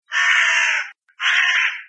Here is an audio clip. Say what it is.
A crow cawing twice
Original recording: "crow" by Nigel Coop, cc-0
bird, caw, crow, raven